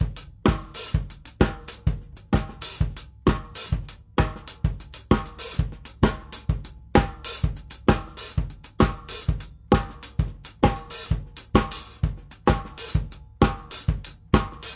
Acoustic drumloop recorded at 130bpm with the h4n handy recorder as overhead and a homemade kick mic.
drumloop, drums